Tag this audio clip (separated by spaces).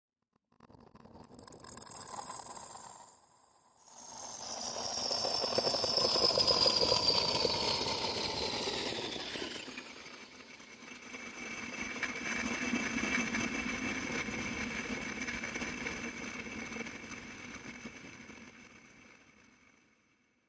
granular-synthesis sound-processing electroacoustic